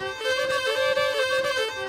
another excerpt from a recording session i did with a fiddler for a tv commercial i scored
field-recording, melody